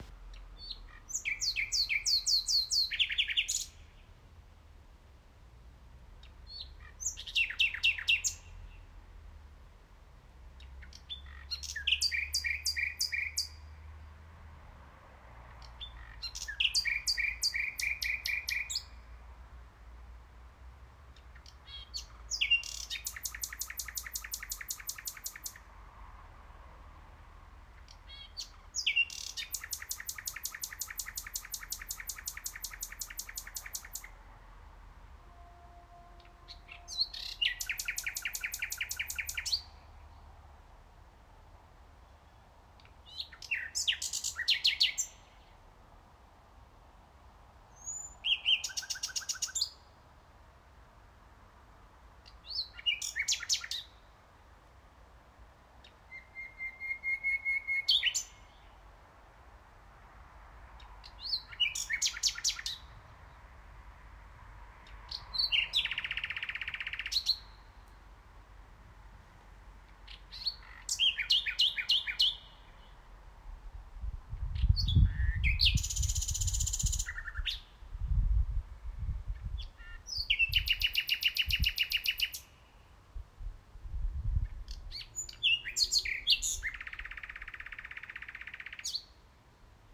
Nightingale - Nachtigall
Sound of a nightingale, recorded with an iPhone Xs via voice recording app and losless quality setting.
forest, field-recording, birdsong, nature, nightingale